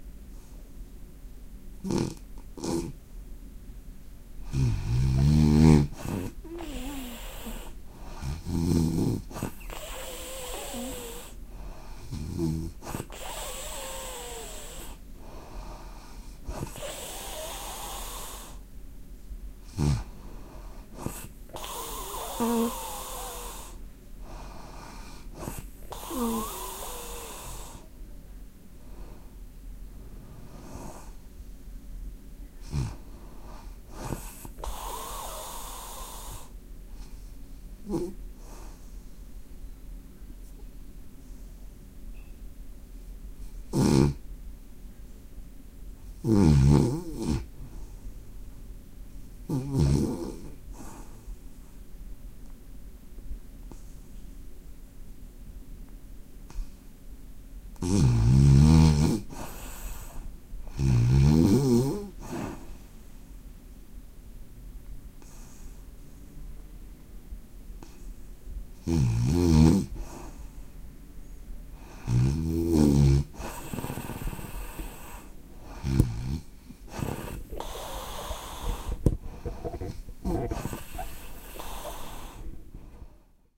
Snoring , snoring with stuffy nose
Snoring Sound Effect with stuffy nose.
You are welcome to use this sound effect.
You can check out more of my sound effects by